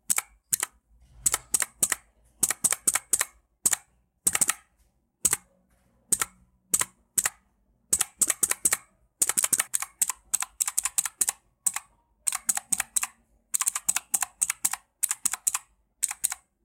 mechanical keyboard
Mechanical keybord sound
keyboard, mecanico, mechanical, teclado, type, typing